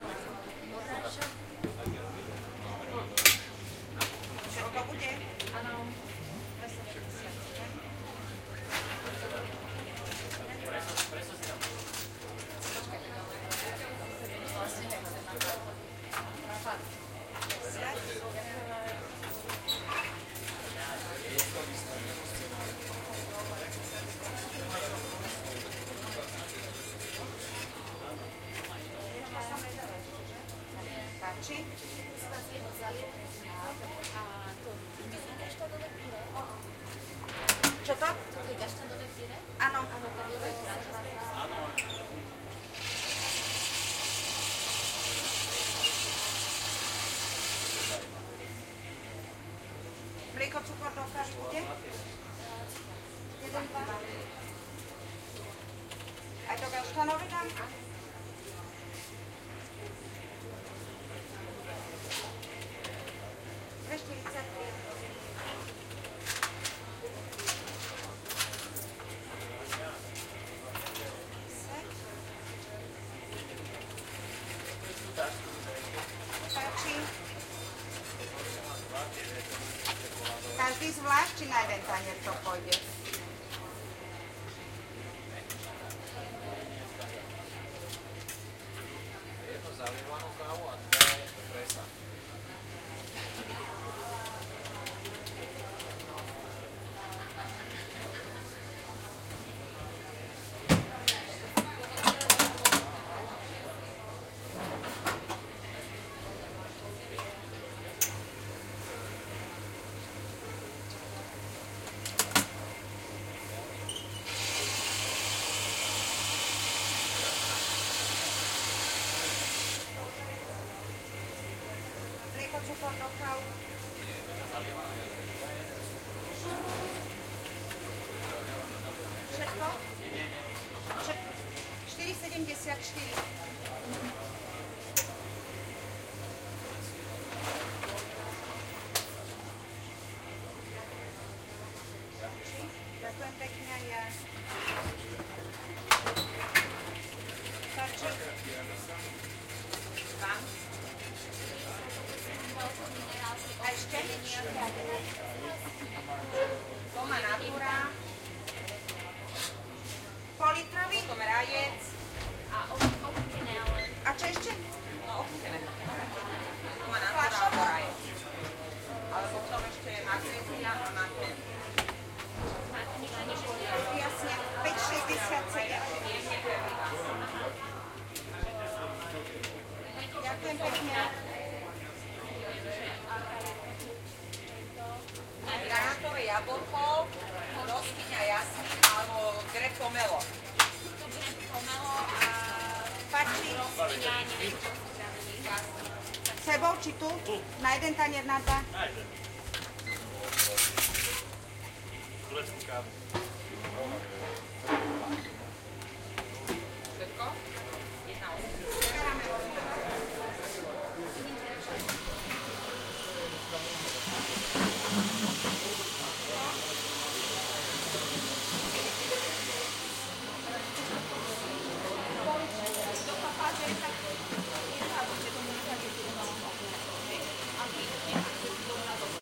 atmo espresso
amosphere recorded by nagra ares-p at restaurant in hospital, bratislava ruzinov.
slovak, free, nagra, espresso, atmo, restaurant, coffee, bar